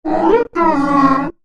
Alien Funny 07
A strange and somewhat comic alien voice sound to be used in futuristic and sci-fi games. Useful for a robotic alien sidekick, who are handy to have around, but unable to help you in battle.